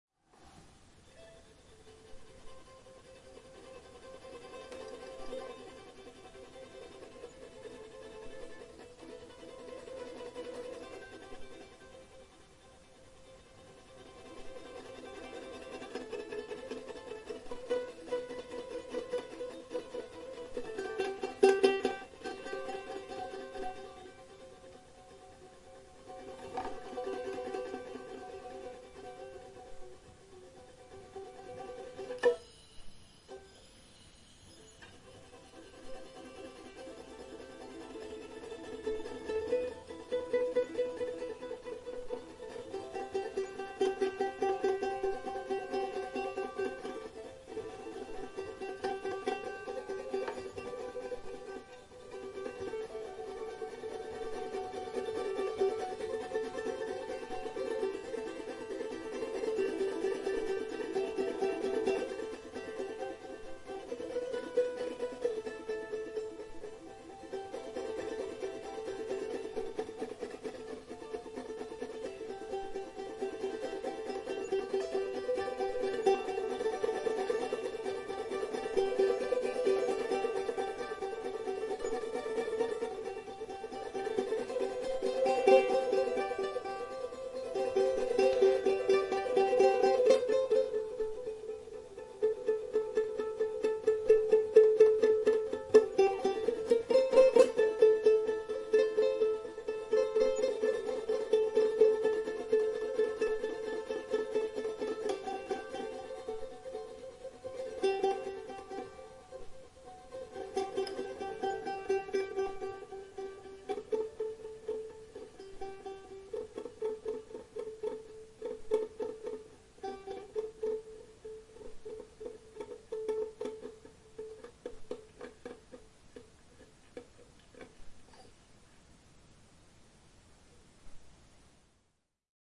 Charango improv3
Very quiet Charango (small Andean stringed instrument) improvisation.
plucked, strings